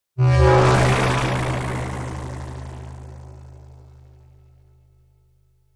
sci-fi1
pad, noise, atmosphere, electronic, sci-fi, starship, futuristic, ambience, engine, background, hover, rumble, energy, ambient, bridge, dark, emergency, effect, machine, soundscape, future, Room, drive, impulsion, spaceship, fx, drone, space, sound-design, deep